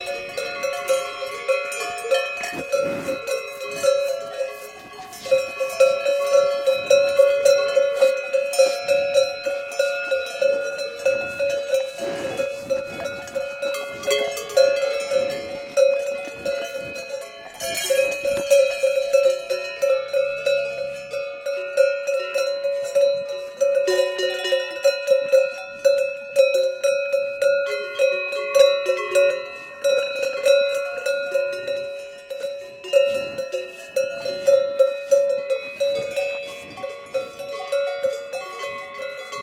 cowbells in southern tirol
recorded with a tascam dr 100
animals, nature, cows, bells, farm